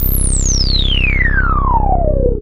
analog bass 11
Fat resonant synth filtered sweep played on vintage analogue synthesizer Roland JUNO106. No processing.
you can support me by sending me some money: